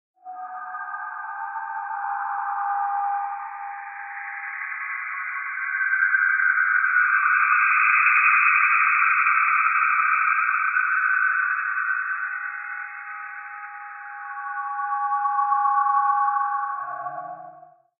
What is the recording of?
Vox Ambience
Long ambient vocal sound with spectral/harmonic envelope morphing.
ambient, spectral, voice, harmonic